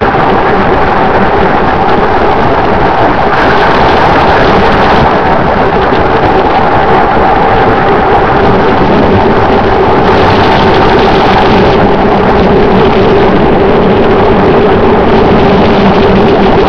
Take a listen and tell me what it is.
All I had to do was upload the sound of a mini waterfall and screw up the formating a little. I don't know what anyone could use it for but there may be somebody out there...
static, effects, fm, water, natural, radio